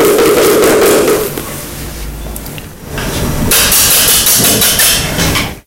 Soundscape LBFR Leslia VS Maurine
France, Labinquenais, Rennes, Soundscape